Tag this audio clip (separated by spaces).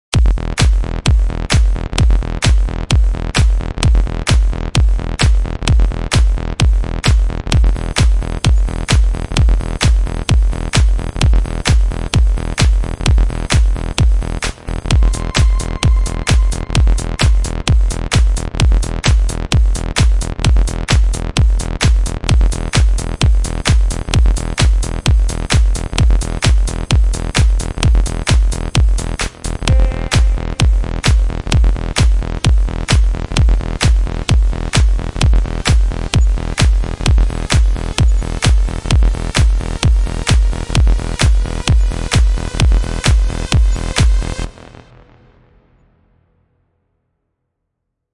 Ride 130 Sweep Bass Electro FX Synth EDM Kick Drums Samples Music Melody Uplifter Clap